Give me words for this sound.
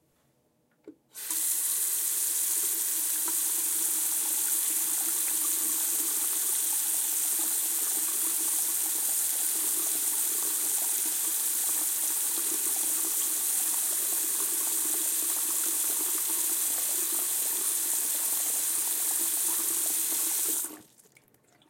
Turning On and Off The Sink 3
A quick recording of someone turning on/off the sink for foley. Recorded on the zoom H5 stereo mic. I cleaned up the audio and it is ready to be mixed into your work! enjoy!